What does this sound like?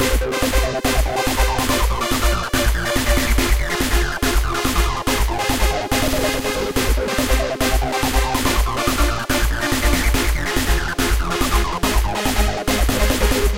This sample was inspired by Flick3r's Nephlim Sample.I Used TS 404,3OSC and Buzz Generator for this.EDIT: This Sound was done with FL-Studio 6. XXLThanks to Flick3r,his Sounds keep me inspired!!!